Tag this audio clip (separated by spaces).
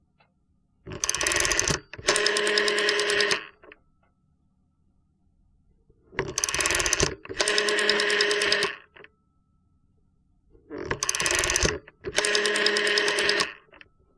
Dialing PTT T65 telephone